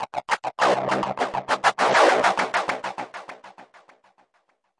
THE REAL VIRUS 02 - SINETOPIA LFODELAYS 100 BPM - C2
This is what happens if you put two sine waves through some severe filtering with some overdrive and several synchronized LFO's at 100 BPM for 1 measure plus a second measure to allow the delays to fade away. All done on my Virus TI. Sequencing done within Cubase 5, audio editing within Wavelab 6.
100bpm, loop, multisample, rhytmic, sequence